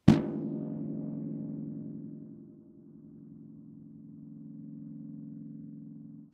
BPH A Phaser
Played an A bass pinch harmonic through an amp that is dying. Heavy Distortion. I applied a phaser with Audtion.